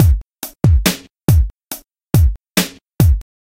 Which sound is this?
Hip Hop beat
Beat for Hip Hop music.
Made in Mixcraft 8 Pro Studio.